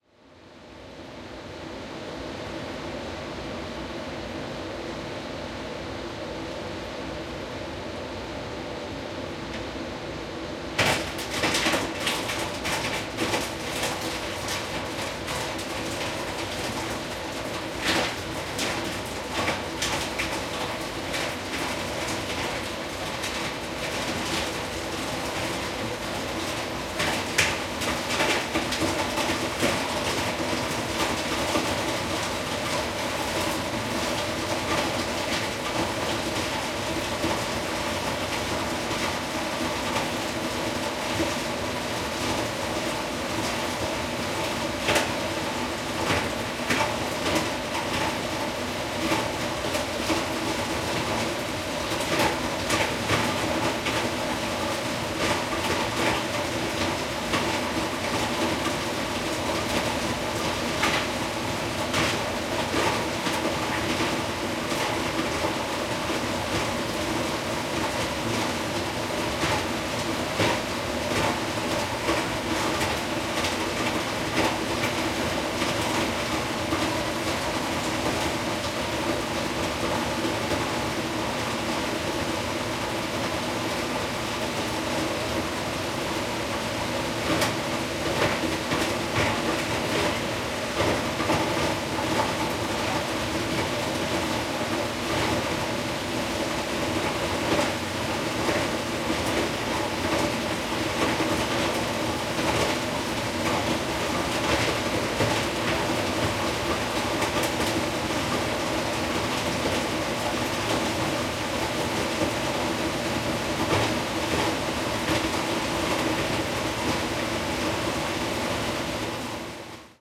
FACTORY Industrial Shredder
Here is an industrial shredder which is shredding shoe sole for recycling purposes.